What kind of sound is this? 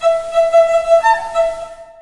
Looped elements from raw recording of doodling on a violin with a noisy laptop and cool edit 96. Baroque sounding loop with concert hall reverb.
classical, barouque, violin, noisy, hall, loop